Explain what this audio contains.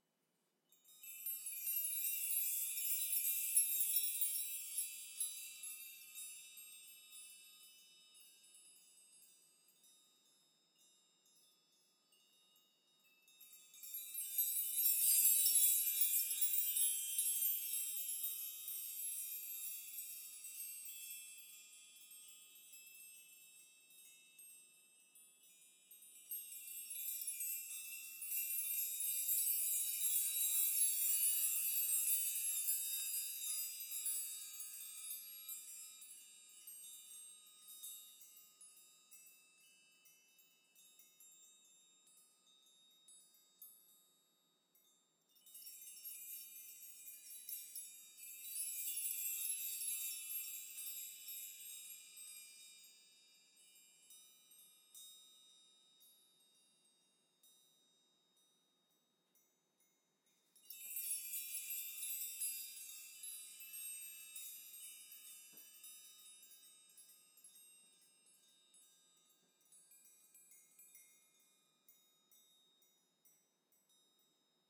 Key Chimes 03 Short-Shake
Close-mic of a chime bar made from various size house keys, short shaking of the frame. This was recorded with high quality gear.
Schoeps CMC6/Mk4 > Langevin Dual Vocal Combo > Digi 003
tinkle, airy, ethereal, magic, metallic, chimes, keys, spell, fairy, sparkle, jingle, tinkles, ting